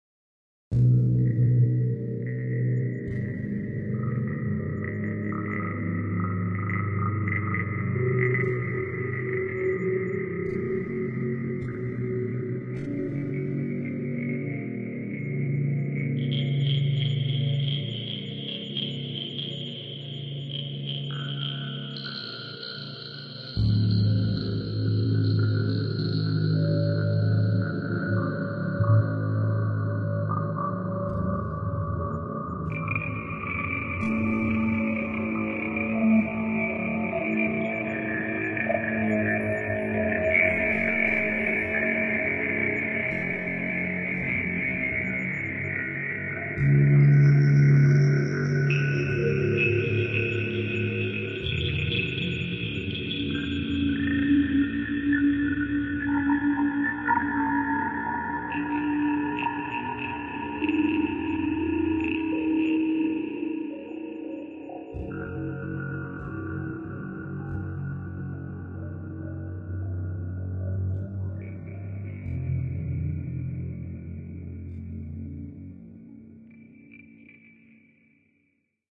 Short soundscapes generated in the percussion synthesizer Chromaphone, a physical modeling synthesizer, recorded live to disk.